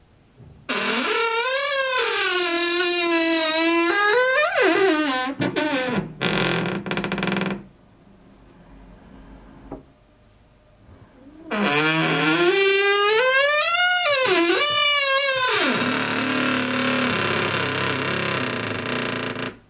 A very creaky door slowly opened and closed.